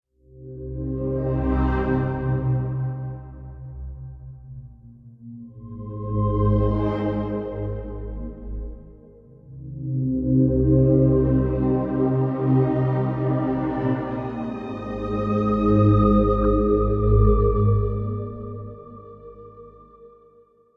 Atmospheric Pad (103BPM)
A pad made in NI Massive. Hope you enjoy.
sci-fi, pad, dark, Major, C, 103BPM, cinematic, synth, horror